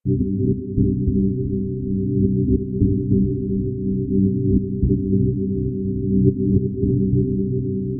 Fragment drone/soundscape
bass, experimental, pad, soundscape